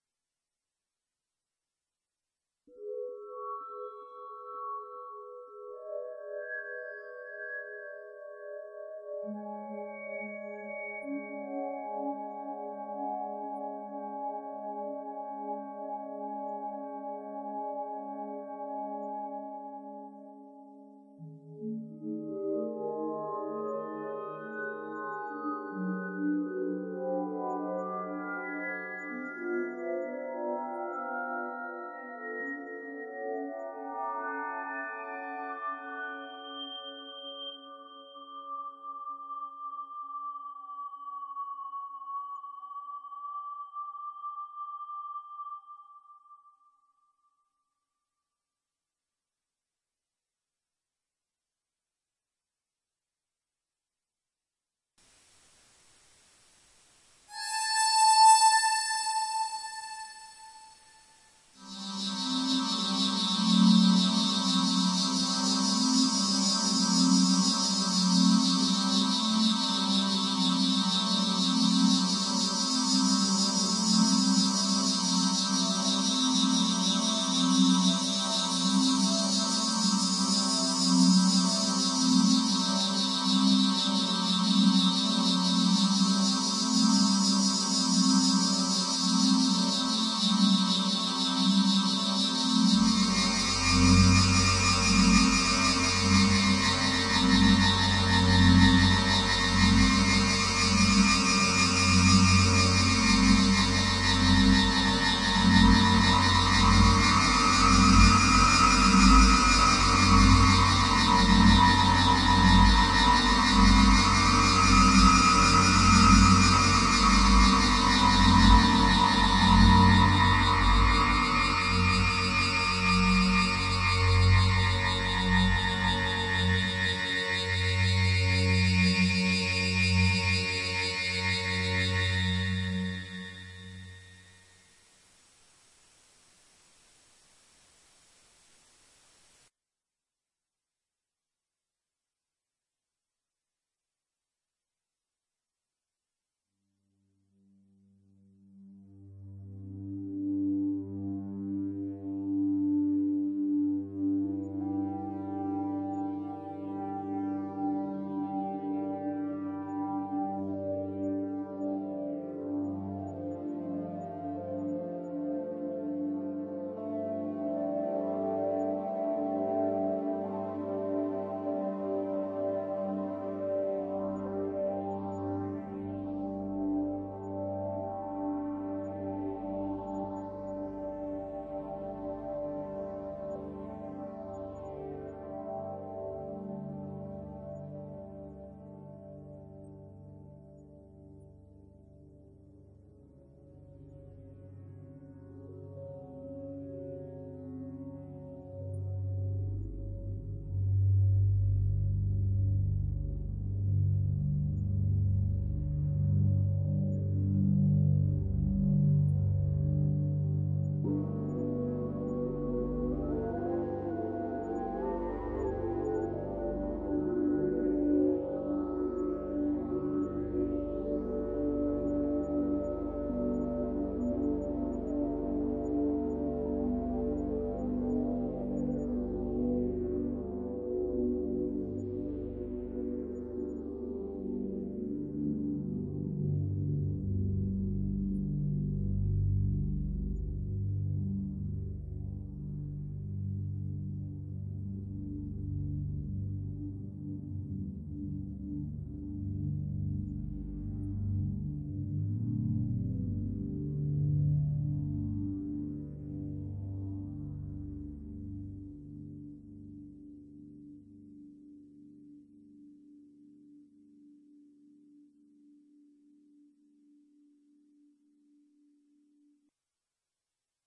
Soundscape Origin 01
Made with Arturia Origin.
atmospheric, soundscape, synth